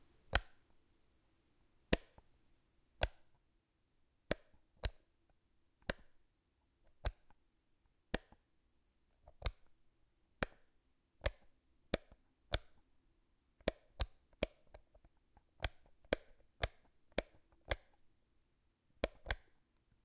Toggling on and off a small metal switch. Recorded with AT4021s into a Modified Marantz PMD661.
button, click, foley, sound-effect, switch, toggle